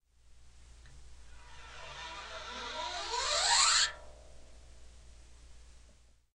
bass guitar pitch
running a coin up and down on a bass guitar string (pitch manipulated)
bass, guitar, manipulated, MTC500-M002-s14, pitch